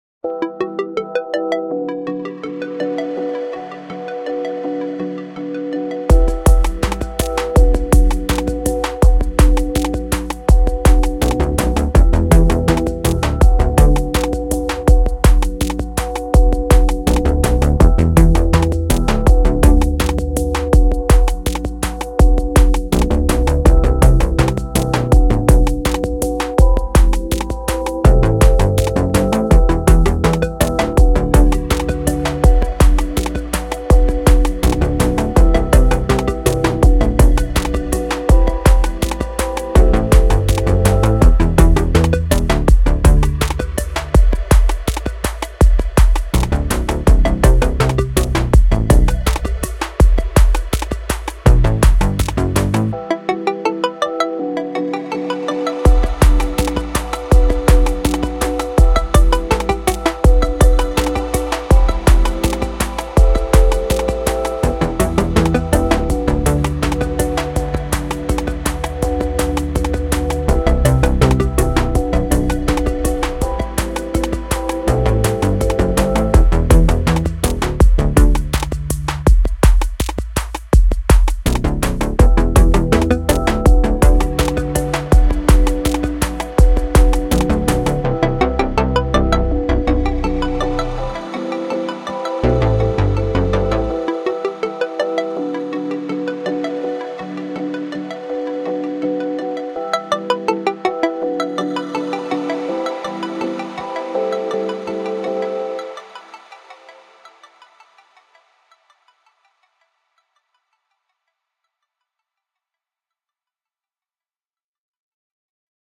ABOUT THIS RELEASE:
This track contains a wonderful combination of pop and chipmusic, as well as a catchy melody and an optimistic attitude. The music has a strong tempo and is great for uplifting endeavors such as pushing forward, powering up, and expending all of one’s energy.
Thank you for listening.
USAGE RIGHTS AND LIMITATIONS:
This work's author MUST be properly credited as follows:
Snabba Ladda, Snappy beat, Cheerful feeling!
ABOUT THE ARTIST:
Creatively influenced by the likes of Vangelis, Jean Michel Jarre, KOTO, Laserdance, and Røyksopp, Tangerine Dream and Kraftwerk to name a few.
Thank you for your cooperation.
Take care and enjoy this composition!